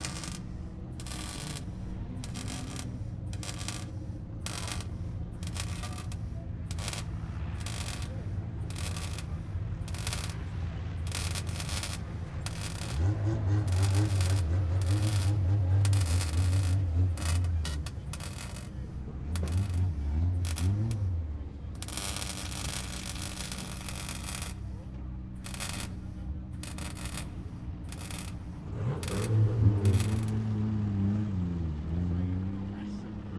Raceway Welding - Engines, Spot Welding
Someone welding part of a car.
buzz, car, weld, spot, tools, mechanics, tool, buzzing, electric, welding